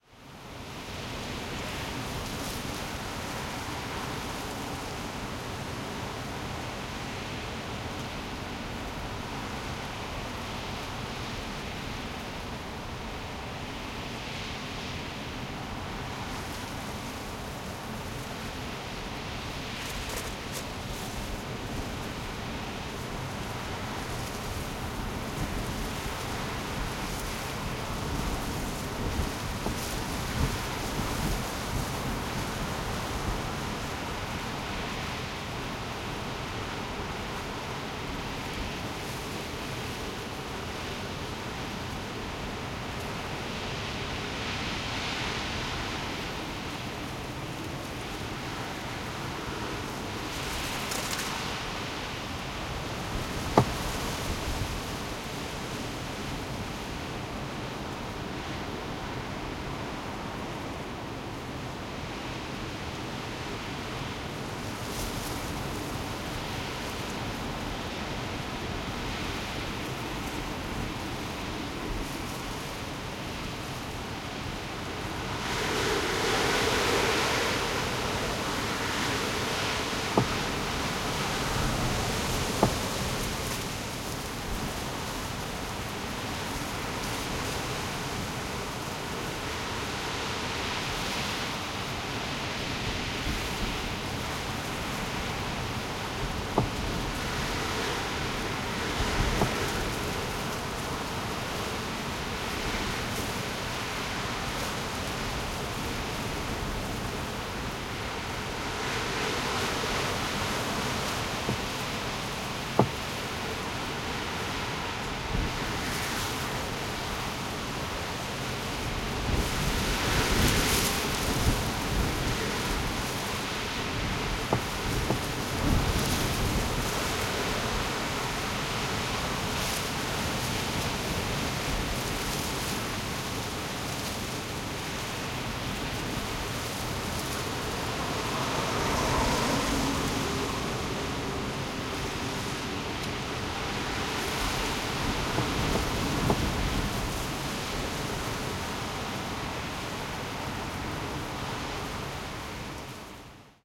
Wind-inside car-091007
Heavy wind recorded from the interior of a car. Tascam DR-100.